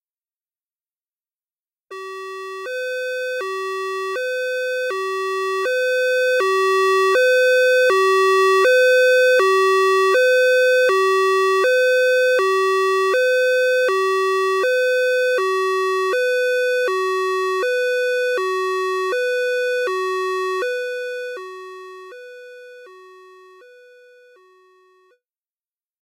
AMBULANCE FULL
Sonido de ambulancia emulado mediante sintetizadores de reason.
hospital, vehicle, ambulance